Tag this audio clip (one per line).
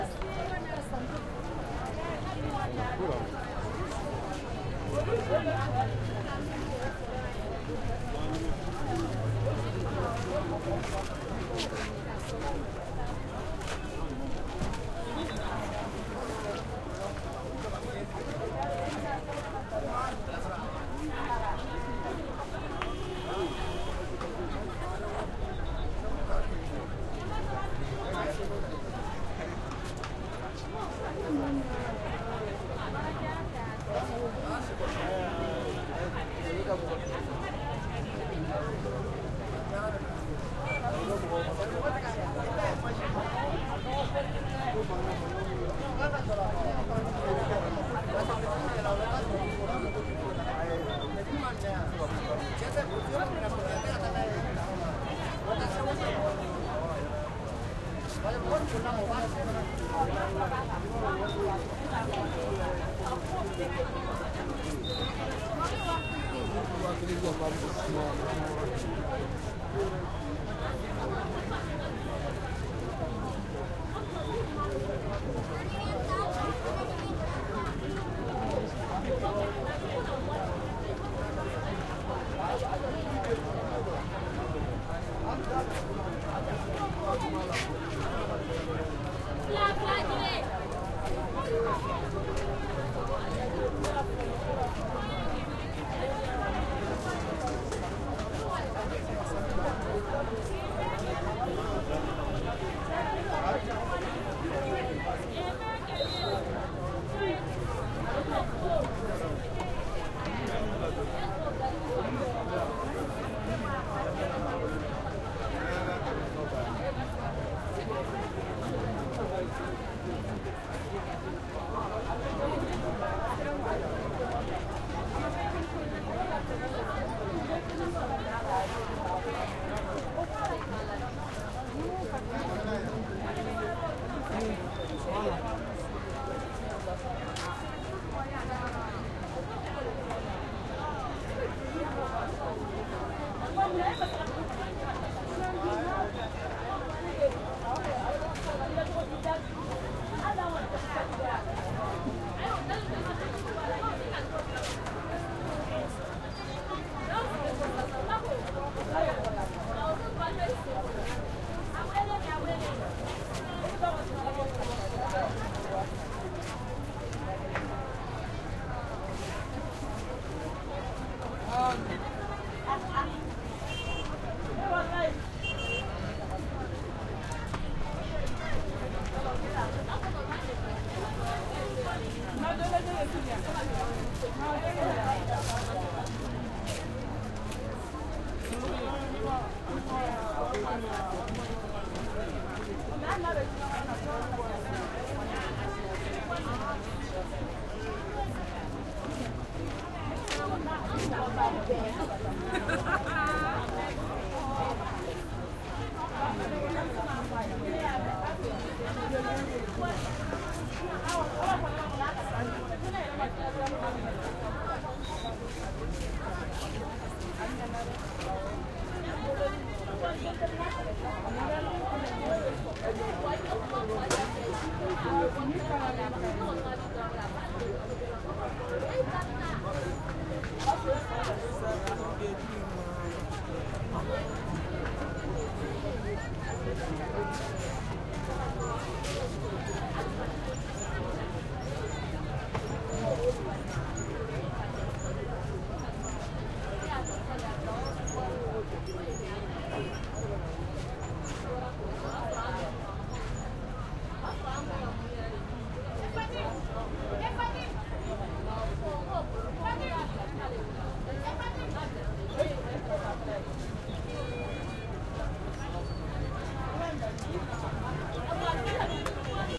Field-recording
talking
market
walking